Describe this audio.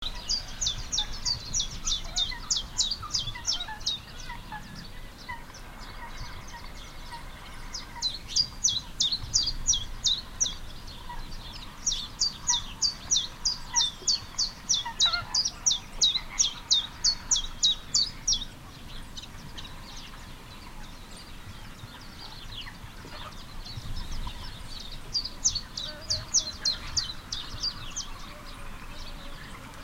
chirps by a little bird I couldn't see at El Lobo pond, Doñana National Park